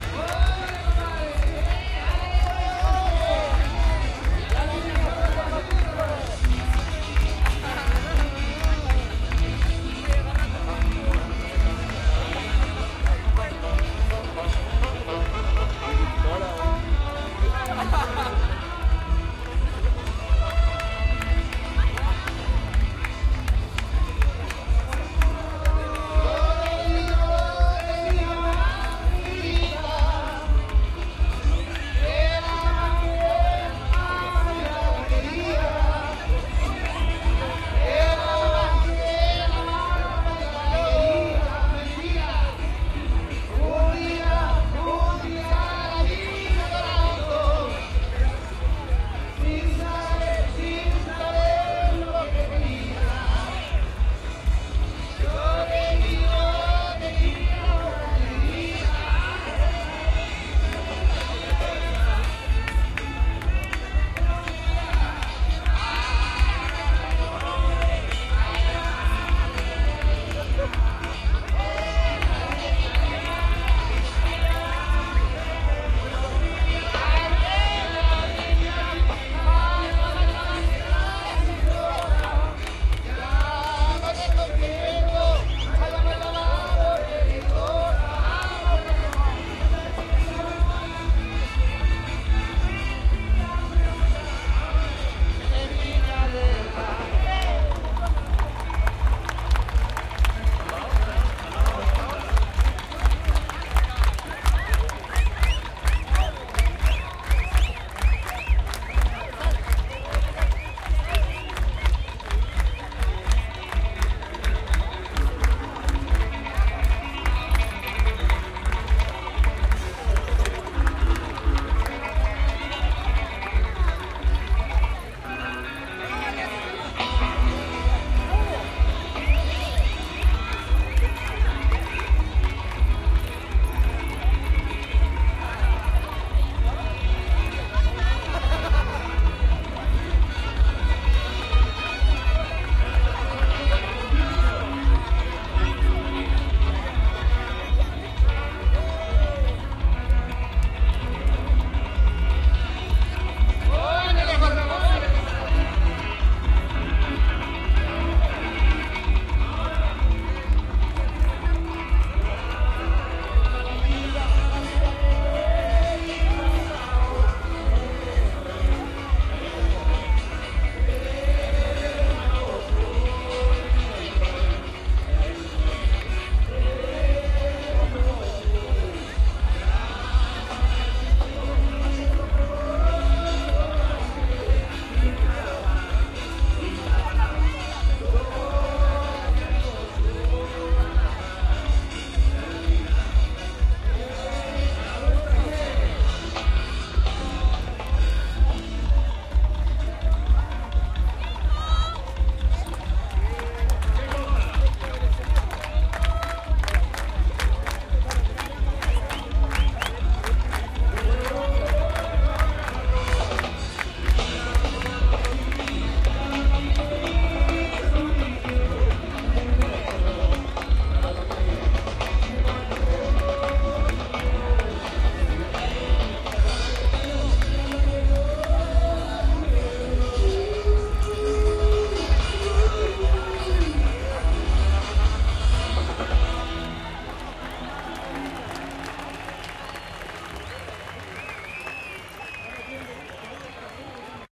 Cueca interpretada por Los Tres.